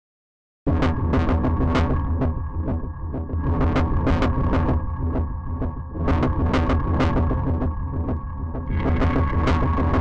Post apocalyptic spice for your sampler made by synthesis and vivid imagination.